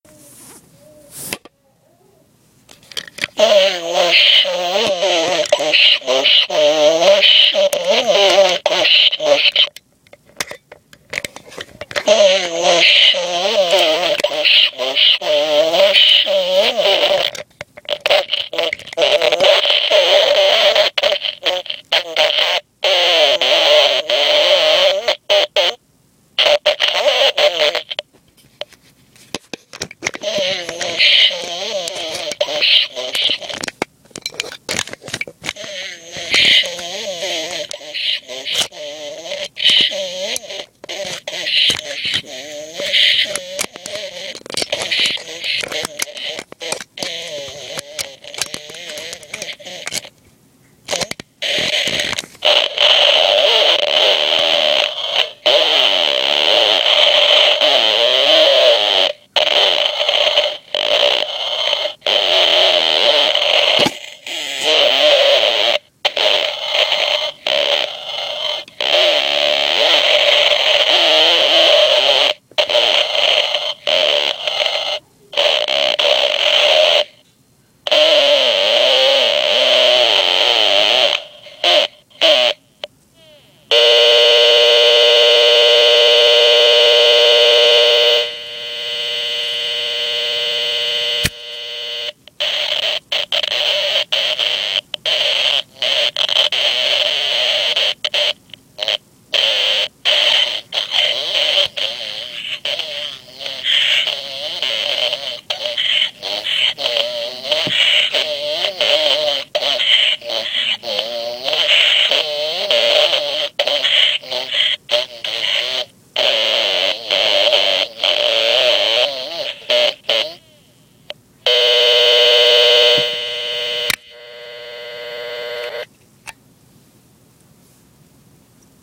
It's a Winnie The Pooh stocking that sings "We Wish You A Merry Christmas" when you push it, but I decided to take one of the batteries out and see what happens. The result is rather funny. Recorded on November 27, 2011 with an iPod Touch.
low-battery, broken, slurred, speech, toy, distorted